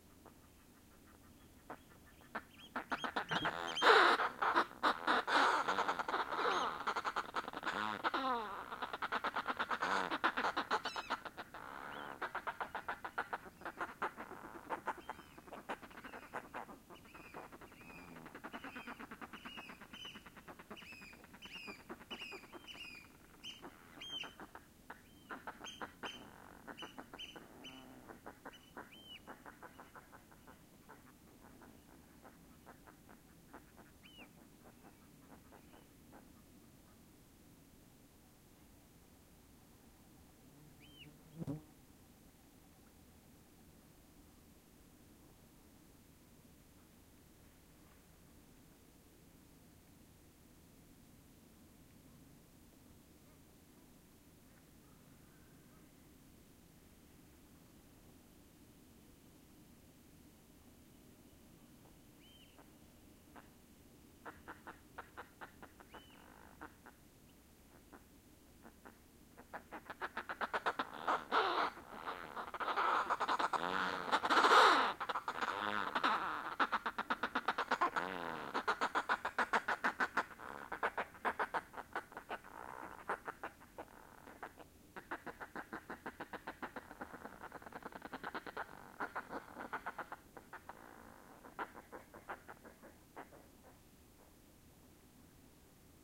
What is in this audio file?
fulmars June2006
sony ecm-ms907,sony mindisc; fulmars landed beside the microphone
fieldrecording, fulmars, nature